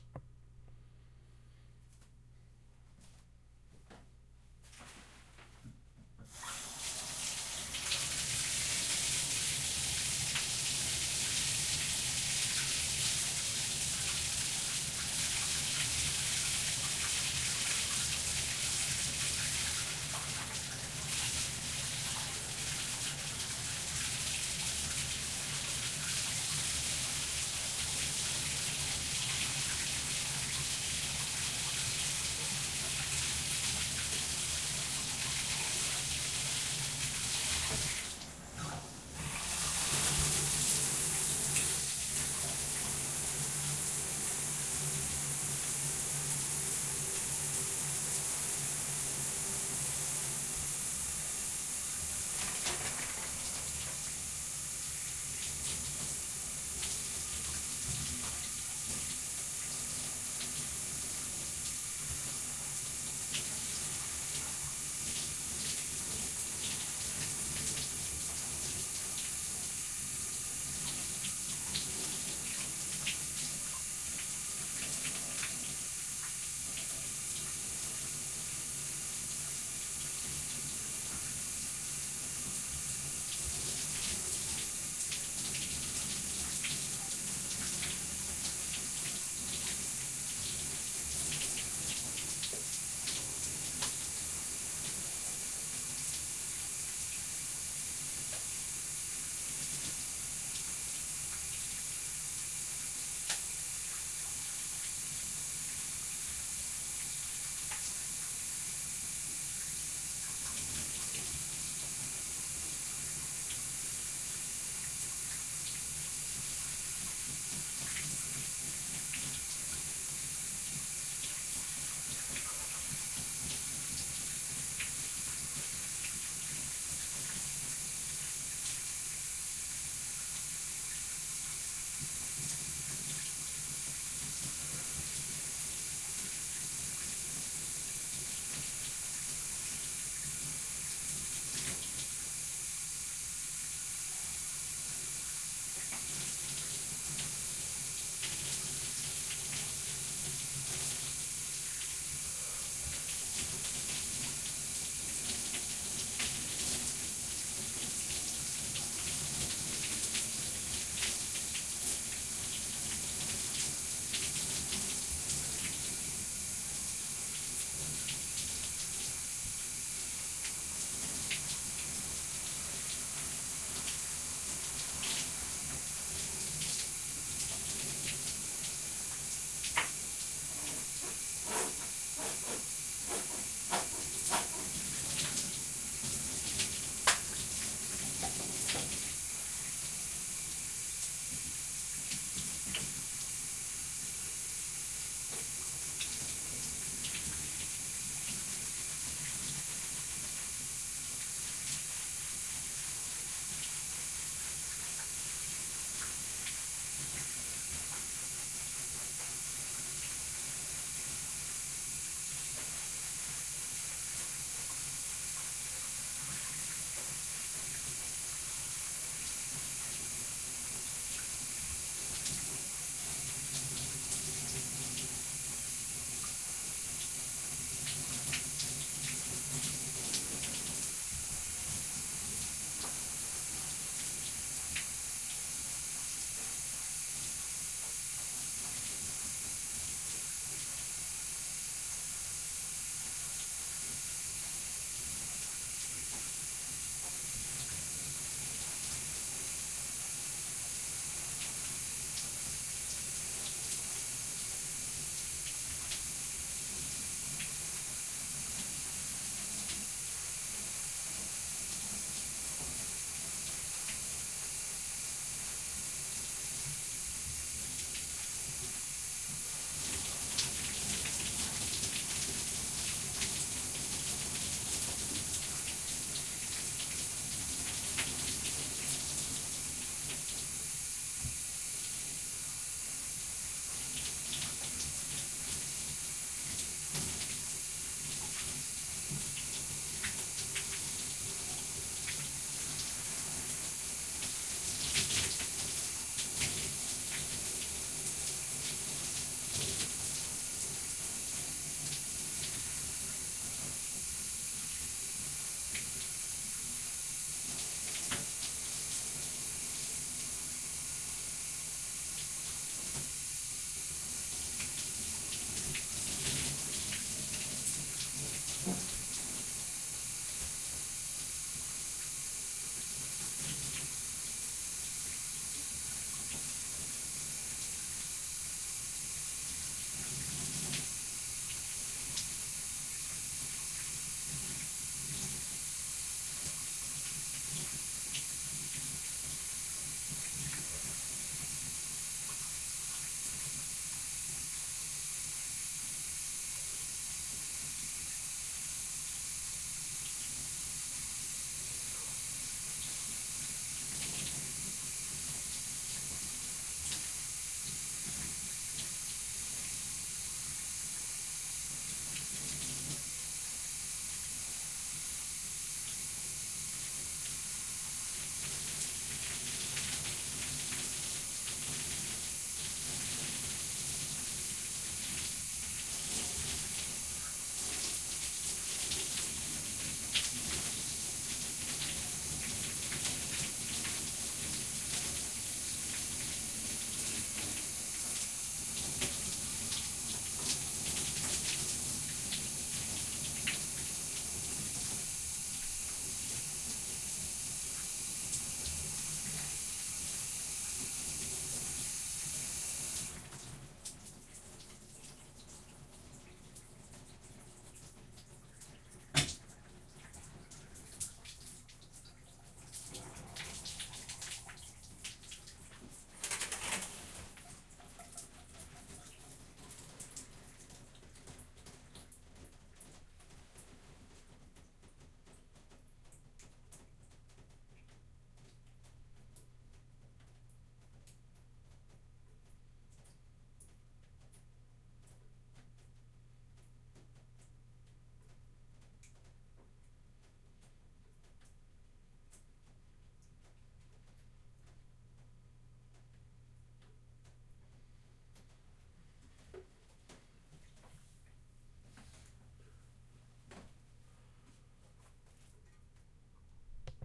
DM House Sounds 002 Shower
Taking a shower in a bathtub in a very small bathroom.
foley, h4n, small, bath, human, zoom, shower, bathroom